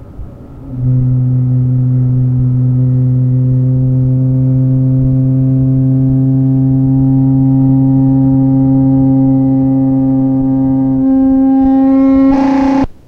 tone rises then distorts (more slowly).